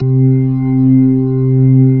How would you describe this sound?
real organ slow rotary
b3, tonewheel